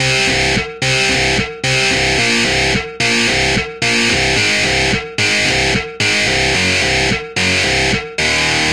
Distorted Synth Guitar 1 C 110BPM
Heavy distorted guitar synth chords. Created by adding a Kontakt Guitar Rig plugin to Logic Pro's Classic Electric Piano preset.